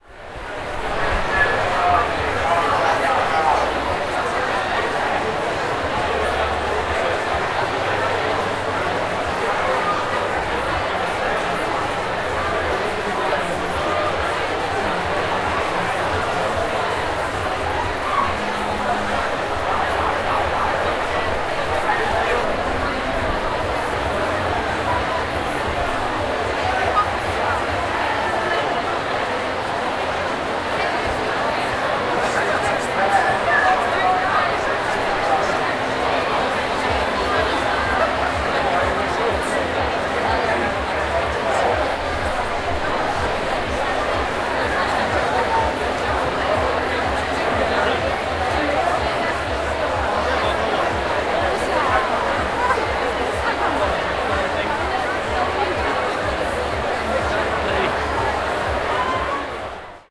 Ambience, Large Crowd, A
Raw audio of the "Fresher's Fayre" event at the University of Surrey. It took place inside a large tent easily holding over 1000 people. A variety of clubs, societies and businesses were involved, which is why there is a random police siren as well as blurred music playing. The event had started 15 minutes prior, so this would likely be the busiest time.
An example of how you might credit is by putting this in the description/credits:
The sound was recorded using a "H1 Zoom recorder" on 29th September 2017.
ambiance, talking, ambience, large, people, crowd